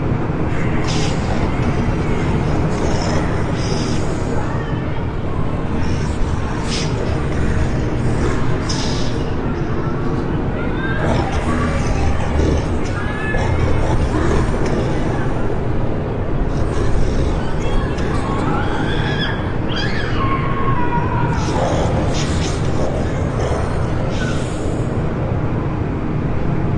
user hell 2
This sound is mixed up from other free sounds to create "hell ambience". you can loop it.
death, devil, hell, horror, inferno, loop, punishment, soul